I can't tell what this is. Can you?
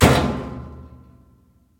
Appliance-Washing Machine-Door-Close-02
The sound of a washing machine's door being closed. Despite it's big, boom-y sound the door isn't being slammed shut.
Appliance, Close, Metal, Door, Washing-Machine